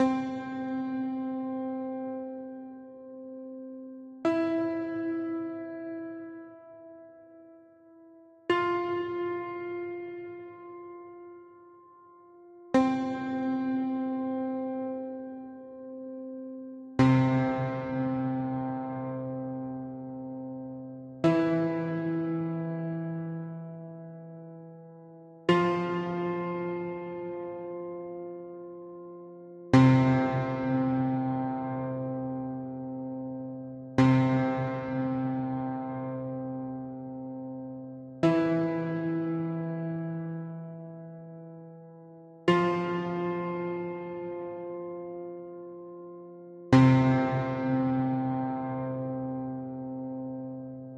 Really static piano notes i created for my intern aswel!
All things i created for my intern needed to be static and easy to follow